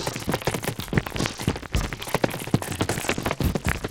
Techno retardo drums shmorfed into even more gurglingness.
glitch, grains, granular, syth